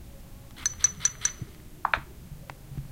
Unlock Mobile Phone Recording at home